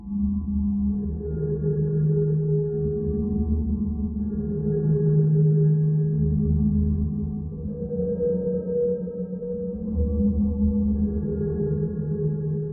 UNEASY BACKGROUND
ATMOSPHERE, EVIL, HORROR, SOUNDTRACK, SPOOKY, SUSPENSE, UNEASY